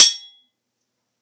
Sword Clash (1)
This sound was recorded with an iPod touch (5th gen)
The sound you hear is actually just a couple of large kitchen spatulas clashing together
metallic, ringing, metal-on-metal, ping, impact, swords, knife